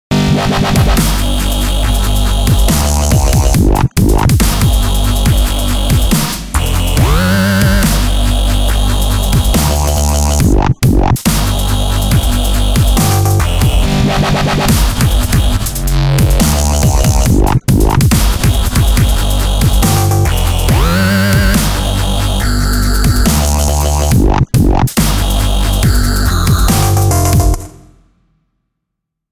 Bass Template 01
bass, dirty, dubstep, filth, fruityloops, hats, high, hits, kick, snare, synth, wobble